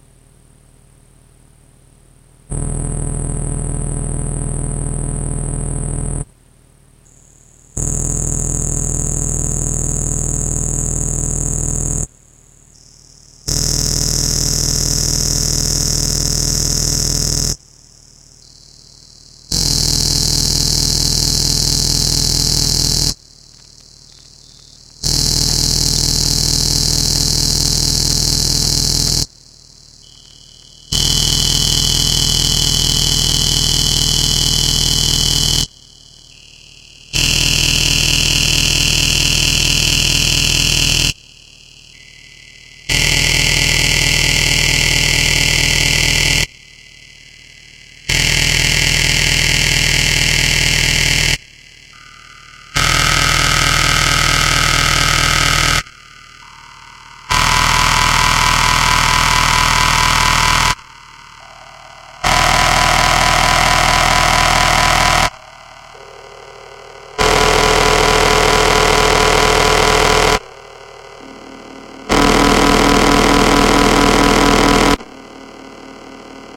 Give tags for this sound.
Kulturfabrik
Synthesizer

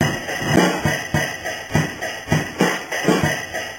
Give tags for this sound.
remix
loop

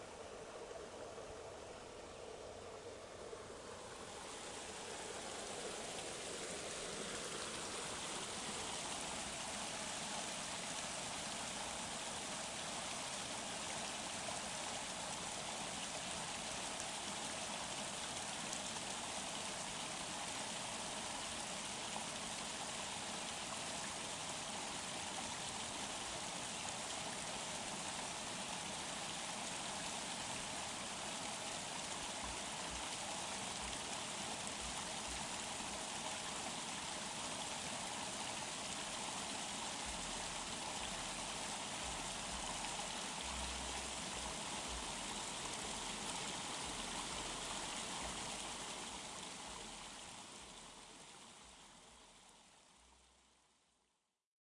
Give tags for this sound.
ambiance
ambient
city
field-recording
nature
river
stream
suburban
water

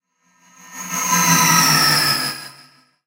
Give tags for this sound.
spell
magic

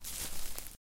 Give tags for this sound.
walk
steps
field
grass
feet
foot-step
footsteps
grassy
footstep
crisp
bracken
foot
step
right-foot
walking
Dry-grass

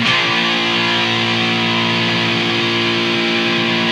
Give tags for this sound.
chord
distorted

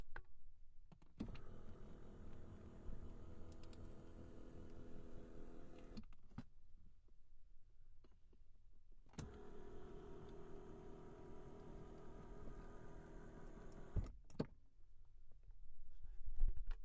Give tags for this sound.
moving-car
car
electronics
mechanics-seat
noise-chair
seat-car

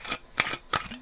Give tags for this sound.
sauna
turning
Bottle
screw

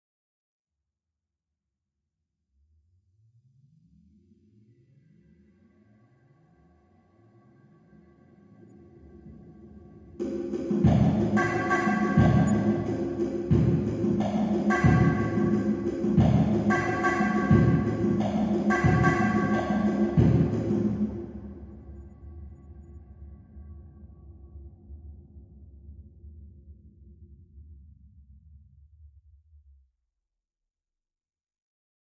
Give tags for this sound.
convolution
impulse
ir
reverb

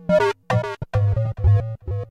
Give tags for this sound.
leftfield
synth
ambient
kat
chords
beats
small
micron
electro
acid
alesis
idm
glitch
base
bass
thumb

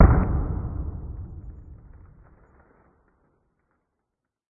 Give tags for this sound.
bang
puff
bounce
pop
smack
snapper
crack
whang
smacker

